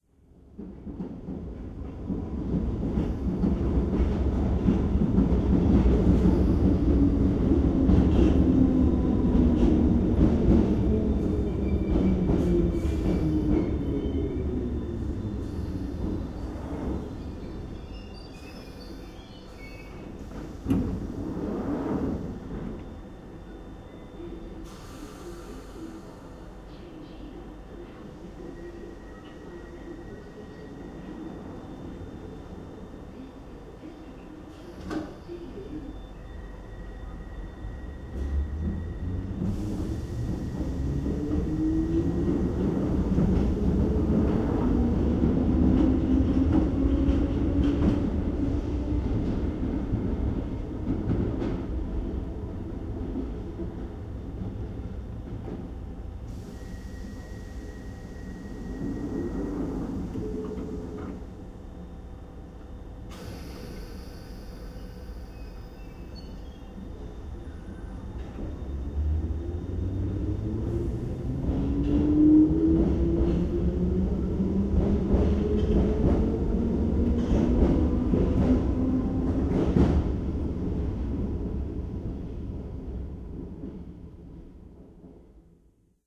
london-aldgate-east-tube-station-train-arrives-and-departs
Stereo recording of a train arriving and departing at Aldgate East tube station, and another train departing on the other track. London Underground trains have rubber wheels and a characteristic kind of rumble. The doors open and close automatically. Faint tannoy announcement in the background, and a couple of electronic warning tones when the doors open and close. Aldgate East station is huge but has a surprisingly 'dry' and 'dead' acoustic so that it is very quiet when the trains have gone.
Recorded on a Zoom H2 recorder, front microphones, 90 degree separation. No amplification or equilisation on this sample.
field-recording, london, london-underground, railway, trains